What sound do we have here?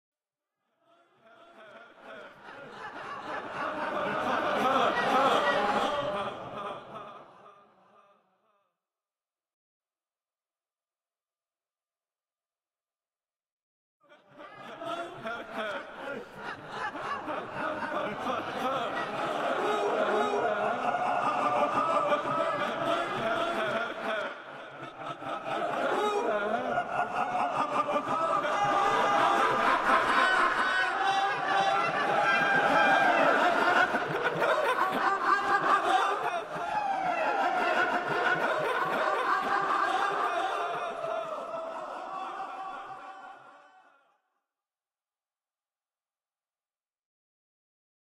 laughing group reversed reverbed
a group is laughing loudly, but the audiofile is reversed, resulting in a ghostly laughing group
ghostly, group, laughing, reversed